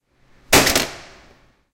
Stapling a paper
This sound was recorded at the Campus of Poblenou of the Pompeu Fabra University, in the area of Tallers in the corridor A-B corner . It was recorded between 14:00-14:20 with a Zoom H2 recorder. The sound consist in a noisy impact of a stapler when it is working. The double action of the stapler is clearly differentiate as two different types of impact (different ressonance frequency)
campus-upf, impact, paper, stapler, UPF-CS12